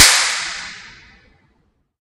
newgarage floor4 nr
Recorded with cap gun and DS-40. Most have at least 2 versions, one with noise reduction in Cool Edit and one without. Some are edited and processed for flavor as well. Most need the bass rolled off in the lower frequencies if you are using SIR.
convolution, impulse, response, reverb